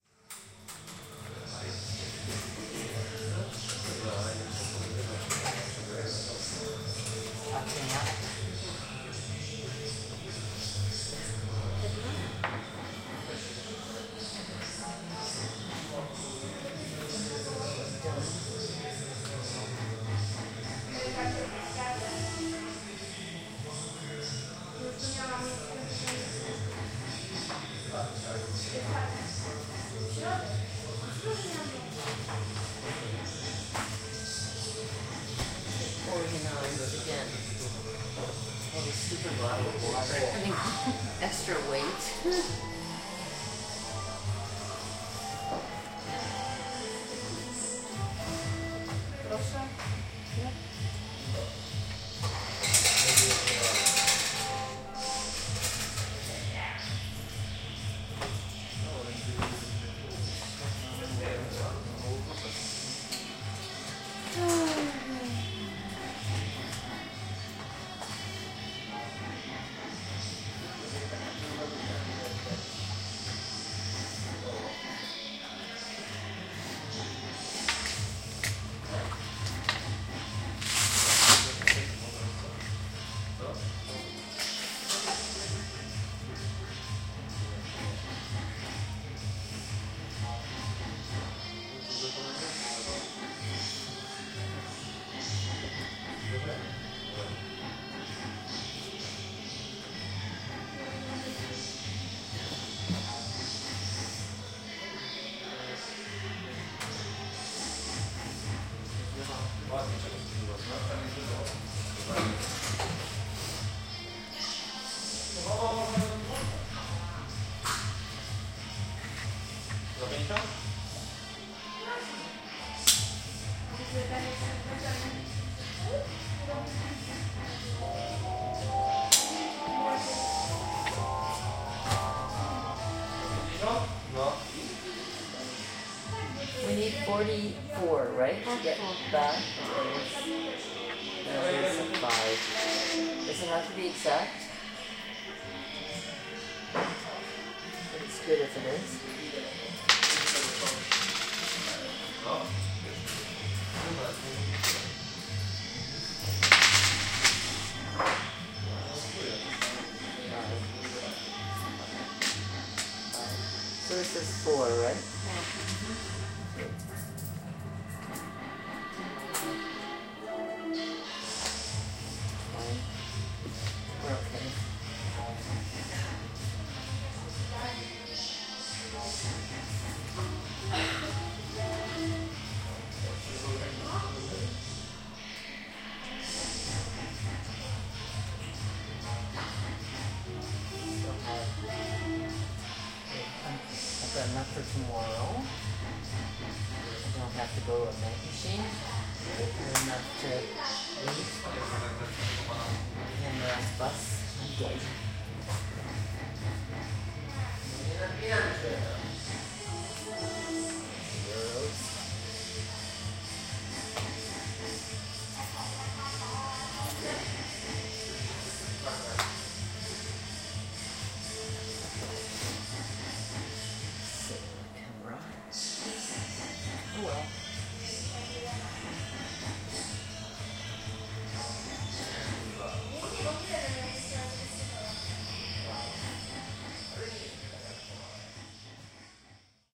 270415 bar in ludwikowice
27.04.2015: about 5 p.m. Bar ambience recorded in a local bar in Ludiwkowice Kłodzkie (Dolny Śląsk in Poland). Sounds od music, slotmachines are audible. Fieldrecording made during a trip with the Canadian filmaker Edie Steiner.